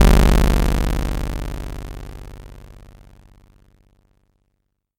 sawtoothc1g1thing
Got bored and tried making something with Audacity. Here's the very slightly seismic charge sounding result.